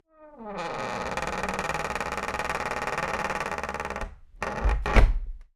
Squeaky Door - 112
Another squeaky door in our hands...
Squeak, Squeaky, Squeaking, Door, Wooden-Door